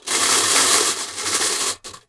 Swirling glass mancala pieces around in their metal container.
game, mancala